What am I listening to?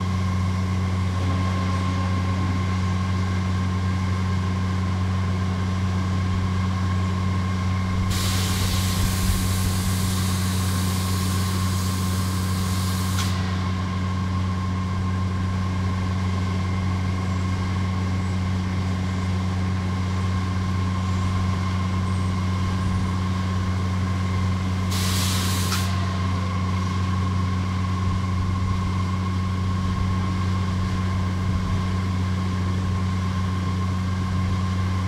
industry grain silo loader motor air release
air, grain, industry, loader, motor, release, silo